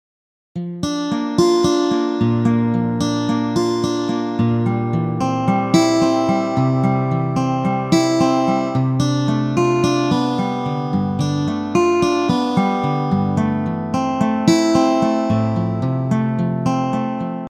Guitar chords
A short few chords on guitar. Done on Garage Band
chords, guitar, music, short